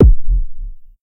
Vermona KICK 4
Vermona DRM 1 One shot Sample